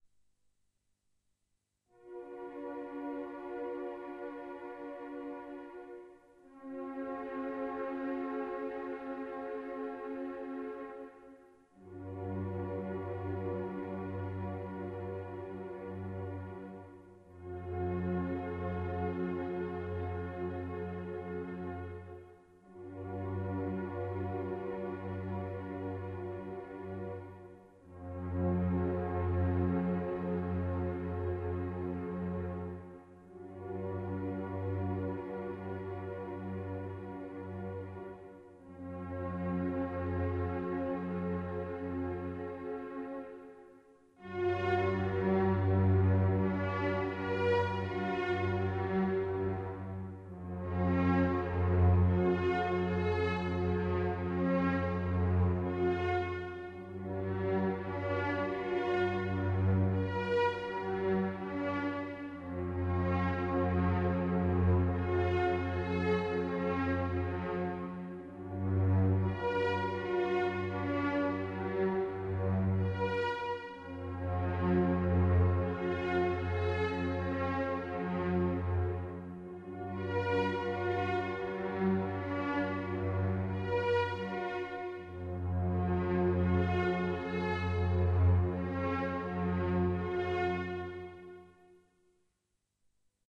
emotional strings
Small bit of music created for RPG styled games. Created with a syntheziser and recorded with MagiX studio.
home, orchestral, strings